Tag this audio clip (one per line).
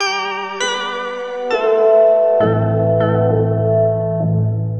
electronica
loop
synth